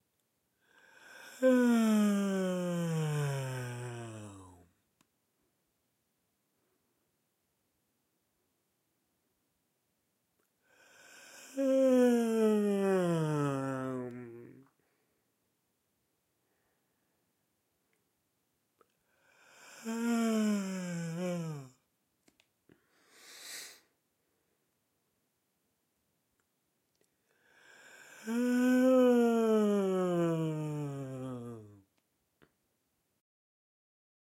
Male yawning using a Zoom H6 with an XY mic and a foam screen and tripod in a controlled bedroom with tiled floors. Recorded standing in front of the recorder. Recorded for a school project for a SFX library.